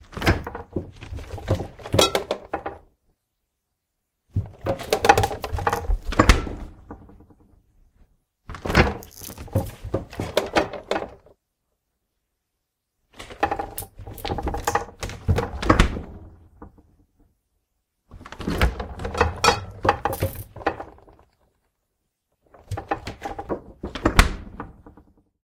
Opening and Closing of a Fridge with wiggling glass bottles